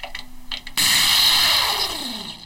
I have never sabotaged someone's car tyres. I was curious and wondered how it may be feeling to puncture a tyre, so I ran a bowie knife in a old wheel I had. It wasn't so easy as I thought, had to use rather strong hand .